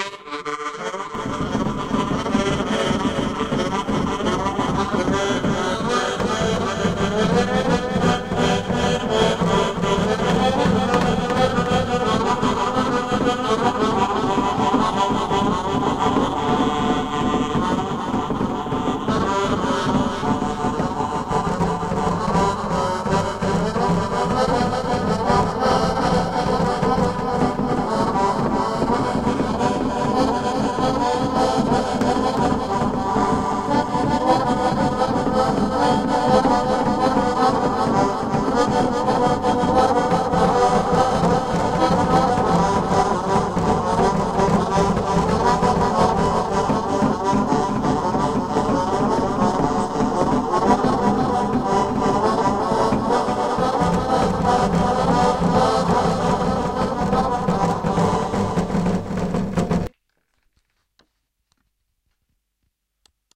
Evening entertainment aboard starship Enterprise. Drum and mouth harmonica.
Space, music, spaceship, entertainment, Enterprise